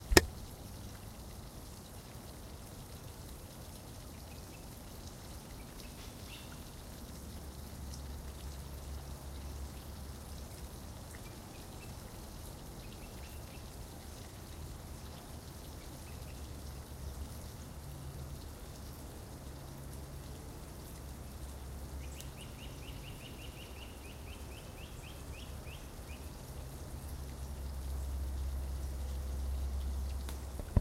Recorded in a small wetland preserve in North East Florida traffic in the background sorry about the beginning thud.
Florida, Wetlands, ambience, ambient, bird, birds, field-recording, nature, traffic